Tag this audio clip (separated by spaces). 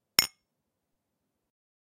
cheers glasses